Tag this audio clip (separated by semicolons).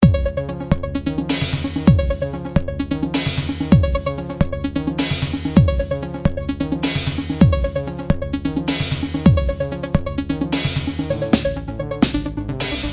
electronic; loops; music; prism